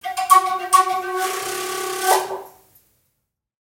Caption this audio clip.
NATIVE FLUTE FIGURE 01
This sample pack contains 5 short figures played on a native north American flute, roughly in the key of A. Source was captured with two Josephson C617 mics and a small amount of effects added. Preamp was NPNG, converters Frontier Design Group and recorder Pro Tools. Final edit in Cool Edit Pro.
north-american, indigenous, indian